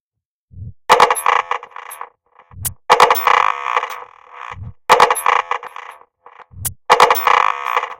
Massive Loop -12
A four bar electronic loop at 120 bpm created with the Massive ensemble within Reaktor 5 from Native Instruments. A loop with an experimental feel. Normalised and mastered using several plugins within Cubase SX.
120bpm, electronic, experimental, loop, rhythmic